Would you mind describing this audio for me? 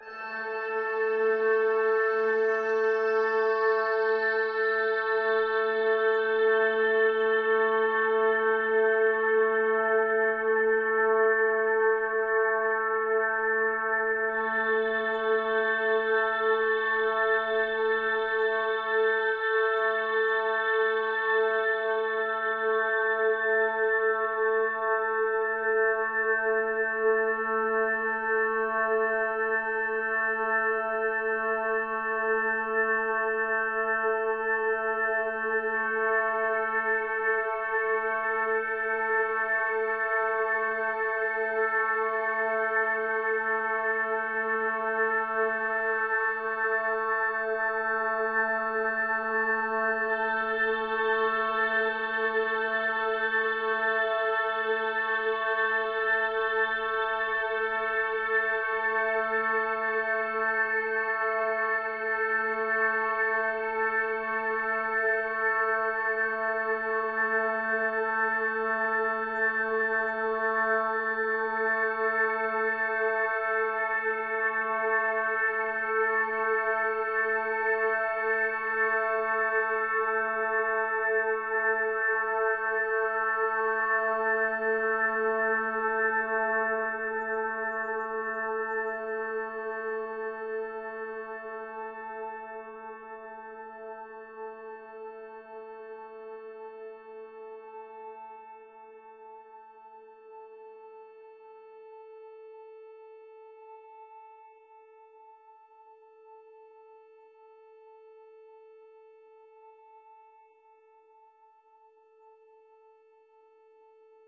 LAYERS 016 - METALLIC DOOM OVERTUNES-82
LAYERS 016 - METALLIC DOOM OVERTUNES is an extensive multisample package containing 128 samples. The numbers are equivalent to chromatic key assignment covering a complete MIDI keyboard (128 keys). The sound of METALLIC DOOM OVERTUNES is one of a overtone drone. Each sample is more than one minute long and is very useful as a nice PAD sound with some sonic movement. All samples have a very long sustain phase so no looping is necessary in your favourite sampler. It was created layering various VST instruments: Ironhead-Bash, Sontarium, Vember Audio's Surge, Waldorf A1 plus some convolution (Voxengo's Pristine Space is my favourite).
drone, overtones